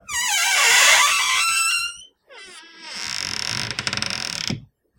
Door-Pantry-Squeak-07
I got this sound from this old pantry squeaking while being opened and closed.
Groan, Squeak, Wooden, Pantry, Door